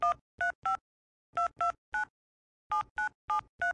dialing numbers
Sound of dialing a US telephone number.